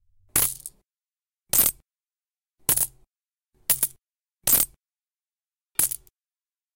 Dropping coins on other coins.
Enjoy!
cash, change, coin, coins, currency, diamond, dime, drop, dropped, dropping, euro, fall, gold, metal, money, nickel, pay, payment, penny, quarter, roll, trade